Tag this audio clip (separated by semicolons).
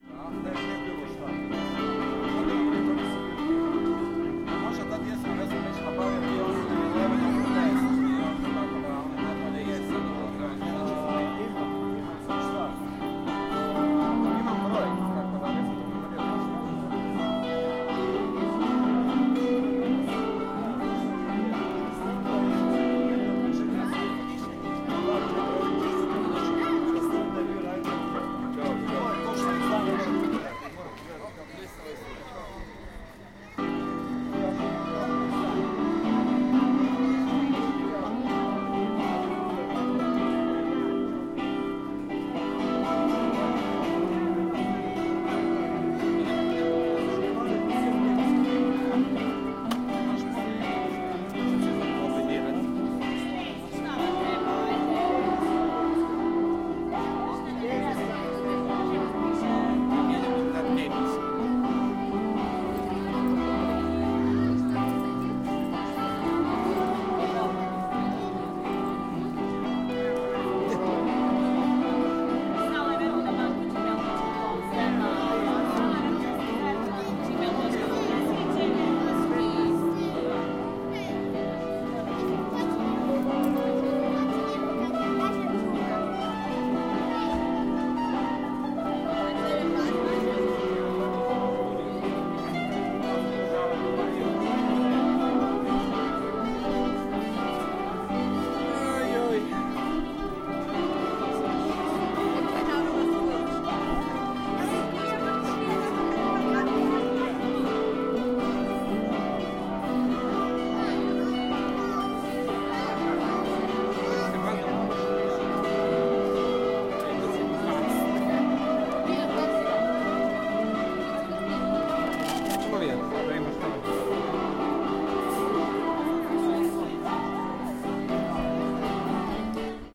Street; Ambience; Crowd; Night; Feast; People; Mess; Summer; Guitar